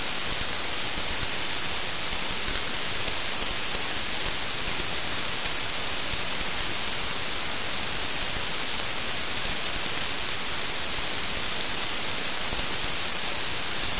Results from running randomly-generated neural networks (all weights in neuron connections start random and then slowly drift when generating). The reason could be input compression needed for network to actually work. Each sound channel is an output from two separate neurons in the network. Each sample in this pack is generated by a separate network, as they wasn’t saved anywhere after they produce a thing. Global parameters (output compression, neuron count, drift rate etc.) aren’t the same from sample to sample, too.
harsh, lo-fi